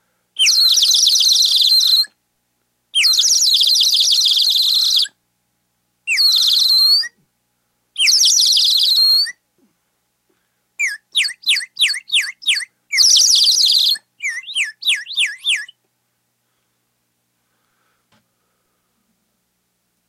water bird whistle
This is the kind of whistle you put water in, and it warbles the tone to sound like a bird.
SonyMD (MZ-N707)
bird, water-whistle, sound-effect, whistle